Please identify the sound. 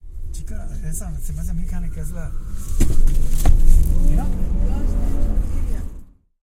The idiot in the other lane decides to give it a go, even though he/she quite clearly saw our truck coming out of the hospital parking lot. Hey, who says a 68 year old can't drive! This grandpa still has the mentality, flexibility, and reflexes of your average fit teenager these days
motor, screech, idiotic, tires, hospital, car, person, fault, truck, accident, idiot